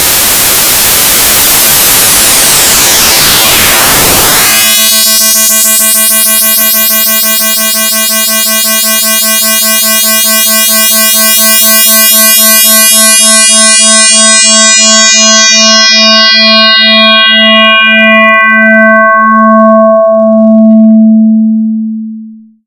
from high-treble chaos, to FM-like A 220hz sine.
made from 2 sine oscillator frequency modulating each other and some variable controls.
programmed in ChucK programming language.
chaos, sine, sci-fi, chuck, programming